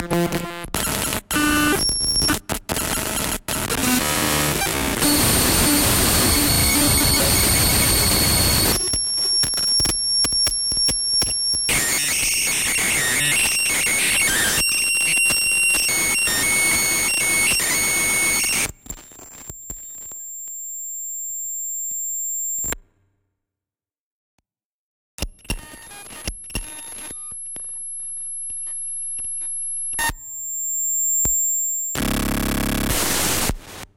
Glitchy sounds02
Processing (Compression, EQ, Reverb) done in FL Studio.
digital, strange, electronic, noise, glitch, weird